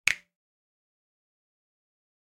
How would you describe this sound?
Real Snap 8
Some real snaps I recorded with an SM7B. Raw and fairly unedited. (Some gain compression used to boost the mid frequencies.) Great for layering on top of each other! -EG
finger, finger-snaps, percussion, real-snap, sample, simple, snap, snaps, snap-samples